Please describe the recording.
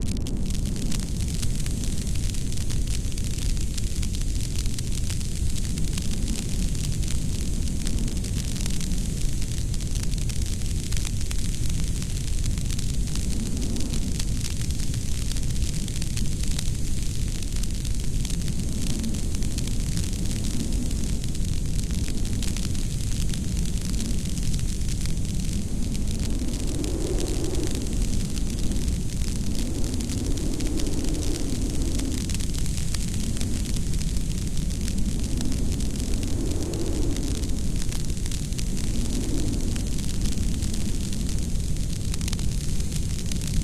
some generative wildfire sfx made with sunvox
the crackling sounds were made by taking some highpassed white noise, ring modulating it with some more white noise, and then using a noise gate to usilate the loudest 'crackles'. the rumbling was created using lowpassed white noise, and the wind was made by bandpassing white noise with a high resonance and randomly sliding the filter frequency. everything was sent into a reverb and a stereo expander.